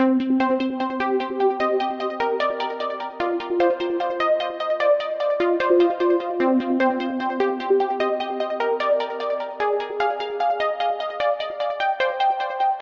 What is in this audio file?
synth sequenca with low dist.
synth, strings, sequence, hard, techno, pad, 150-bpm, trance, progression, melody, bass, distortion, distorted, beat, phase